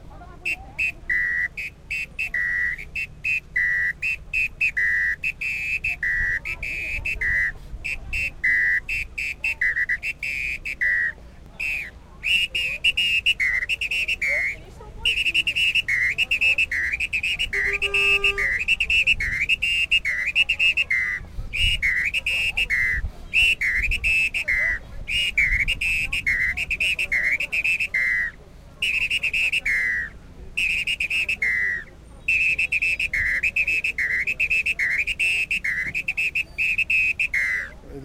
ambi - whistle
Whistle used in carnaval processions and some samba dances.
Rio, whistle, de